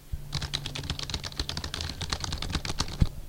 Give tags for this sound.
teclado; computer; keyboard; pc

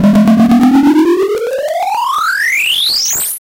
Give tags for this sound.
videogame; beamup; 8bit

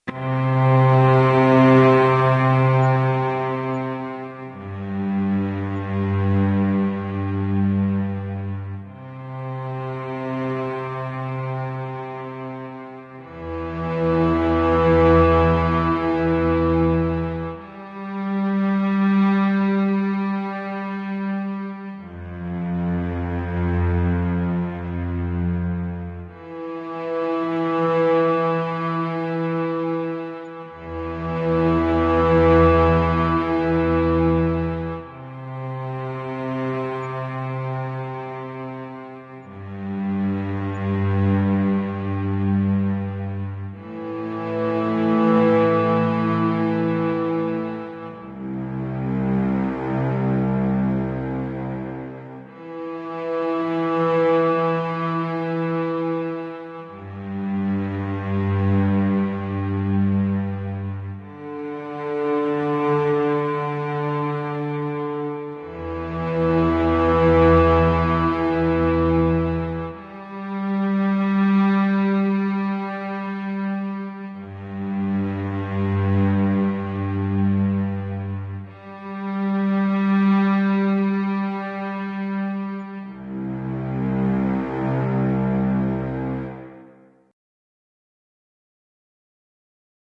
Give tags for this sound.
atmospheric; cello; melodic; moody; music; soulful

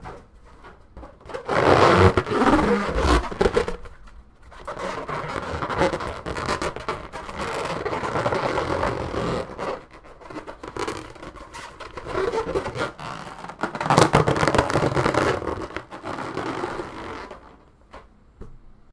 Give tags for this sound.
balloon; rub; squeak

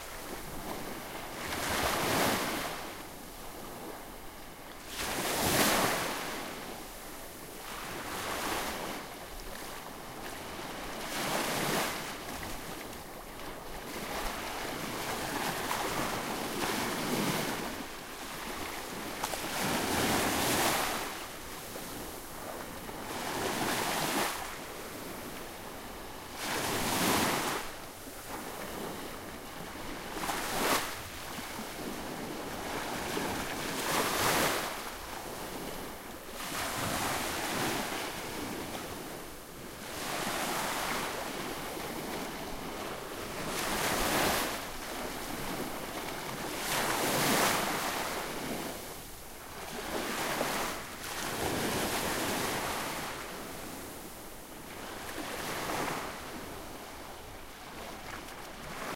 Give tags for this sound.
lapping; wave; waves; ocean; field-recording; coast; shore; beach; sea; water; seaside

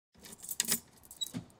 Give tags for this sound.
keys; lock; lockerdoor; mailbox; unlock

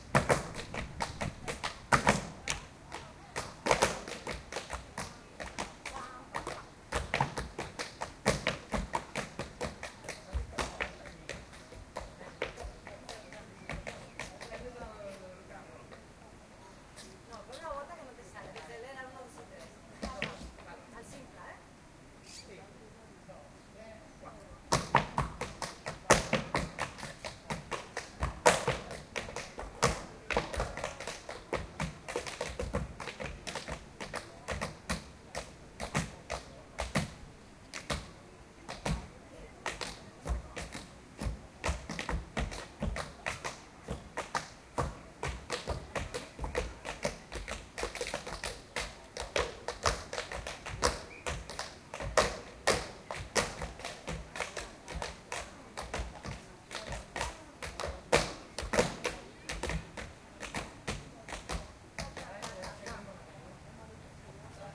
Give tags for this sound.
ambiance; dancing; tap-dance

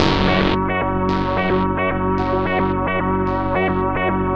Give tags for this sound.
loop; synth; electronica

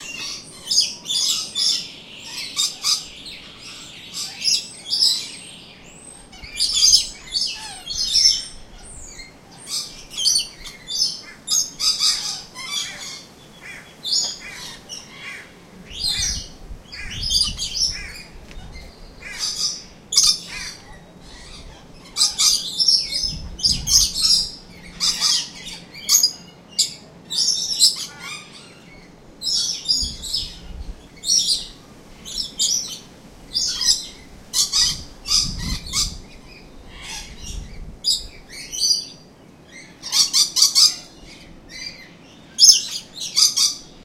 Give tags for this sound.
field-recording; parrot; tropical; aviary; lorikeet; zoo; exotic; bird; birds

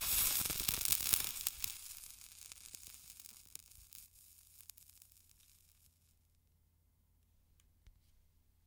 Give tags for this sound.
match; burn; burning; spark; crackle; flame; sparks; sizzle; fuse; fire; cigarette; extinguished

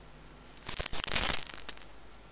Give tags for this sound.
static; noise; Sparks; faulty; electricity; hiss; cable; buzz; sparking; fault

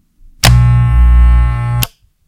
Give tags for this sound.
appliances; buzz; buzzing; click; clipper; clippers; hum; machine; trimmer

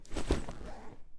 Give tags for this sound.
pack; foley; back; backpack

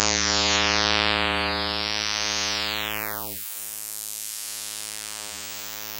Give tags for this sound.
Image; Sound; Image-To-Sound; Soundeffect; Remix

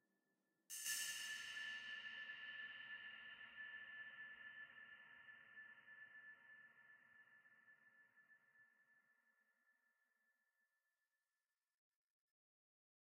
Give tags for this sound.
Ableton; Diffuse; Distant; FSX; FX; Hat; Large-Space; Open; Perc; Percussion; Reverb; Stereo; Techno; Wide

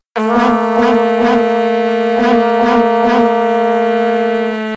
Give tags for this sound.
soccer; stadium; Vuvuzela

